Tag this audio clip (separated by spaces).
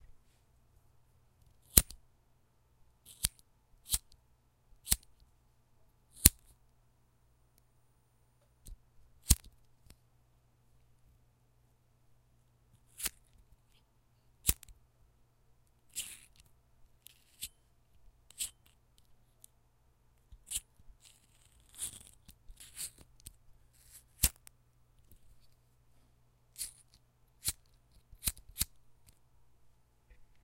Click Light